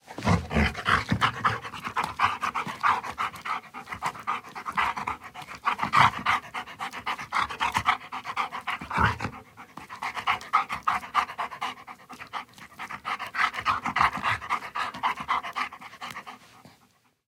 Golden lab retriever in Studio recording